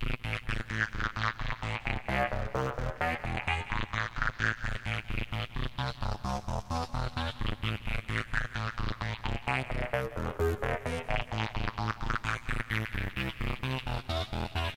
Good for trance music. Short and cutted for loop.